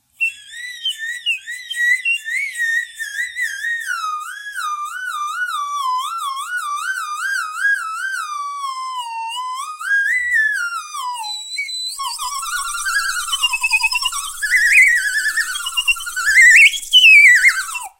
A woodwind thing with a fipple, no tone holes, and a piston at the far end for changing pitch. (Honestly, I don’t know what its name is in English nor in Russian. If you do—comment, please!)
Recorded by Sony Xperia C5305.
Update: horay, now I know its name.
woodwind, bird, cartoon, descending, swanee-whistle, pipe, ascending, fx, slide-whistle